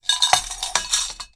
58-Golpe metal
Shock of a metal object
hit, metal, impact